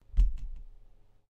A low-frequency bump picked up with the microphone from moving the stand of a condenser microphone.
Microphone: Rode NT1000
Preamp: ART DPSII
Soundcard: RME Hammerfall Multiface
condenser, move, microphone